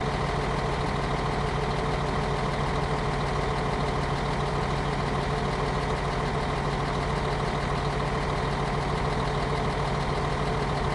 A bus is standing while it's motor is on. I'm recording the sound.
This recording was made with a Zoom H2.
vehicle, zoom-h2, h2, motor, coach, driving, fuel, automatic, zoom, static, standing, car, bus, cars, drive